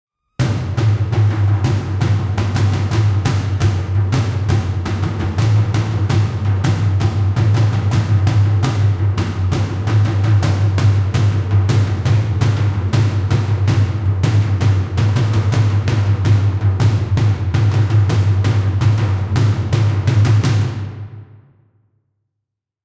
Action drums 7.8 (High)
Some 7.8 drums just on a mid tom played with generic sticking.
actions-drums, garbage, high-drums, improvised, percs, percussion, percussive, production-music, rubbish